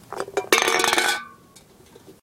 Rolling Can 14
Sounds made by rolling cans of various sizes and types along a concrete surface.